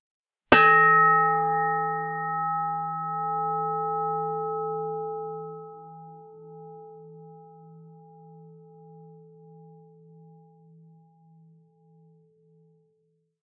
Bell sound. Pitchshifted wine glass tap. Recorded onto HI-MD with an AT822 mic and processed.
bell, bong, chime, hit, meditation